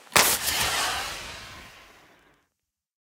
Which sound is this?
flare fire into sky with tail